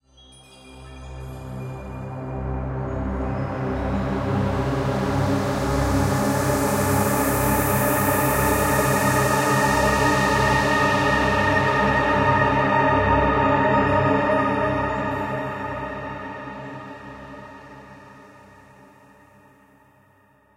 Dramatic pad 4
Deep and dark dramatic pad with alot of disonances. Starts low, builds upwards to the dark skies.
disonantic, dark, pad, deep